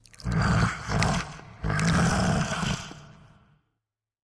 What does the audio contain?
One of the "Bull" sounds I used in one play in my theatre.
breathe, bull, monster, roar